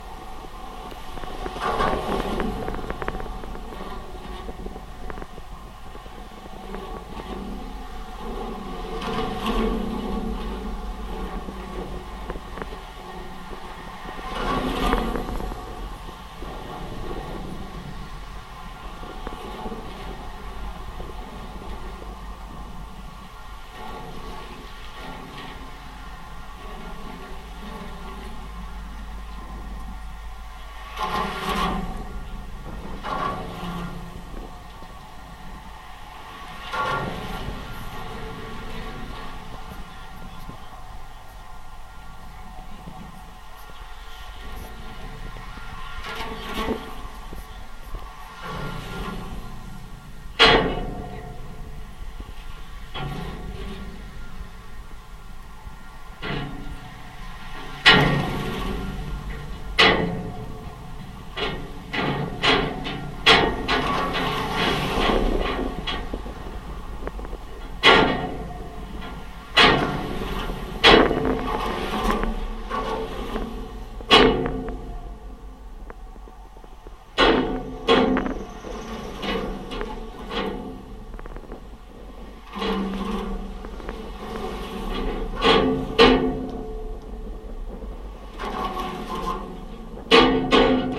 GGB inner safety rail SAE T02
Contact mic recording of the Golden Gate Bridge in San Francisco, CA, USA at the southeast approach; mic held on a traffic-side pedestrian safety rail (Take 02). Recorded December 18, 2008 using a Sony PCM-D50 recorder with hand-held Fishman V100 piezo pickup and violin bridge.
sample, contact, bridge, cable, wikiGong, sony-pcm-d50, field-recording, contact-microphone, Fishman, V100, Golden-Gate-Bridge, piezo